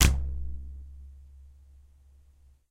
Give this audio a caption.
Close up sound of a very light bow
20, bow, pound